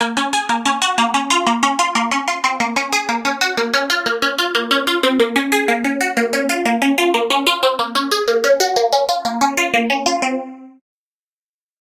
Trance Pluck (185 BPM)
Trance pluck made from Serum